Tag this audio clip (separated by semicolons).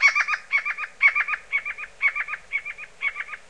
bird; birdsong; dub; echo; effect; electronic; fx; happy; lol; nightingale; reggae; soundesign; space; spring; tape